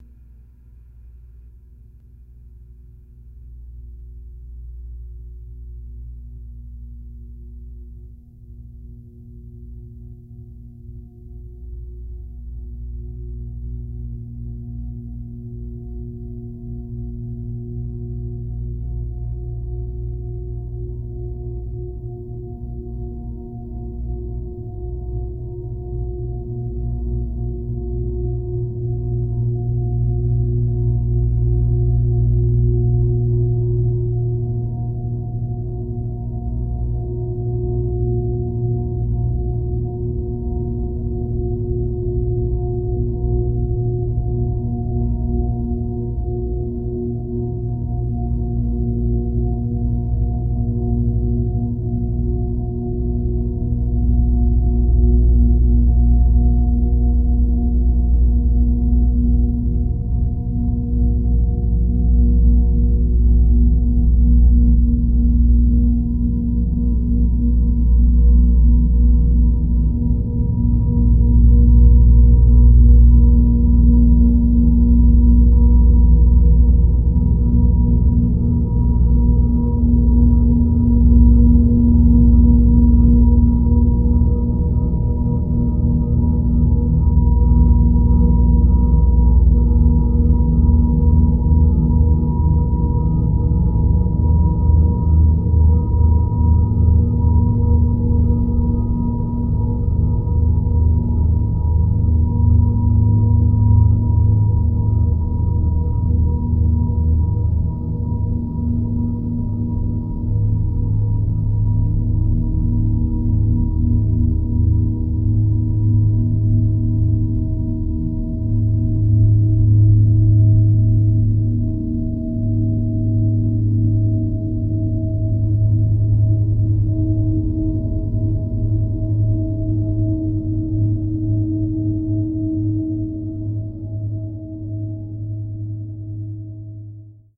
Mellow industrial atmosphere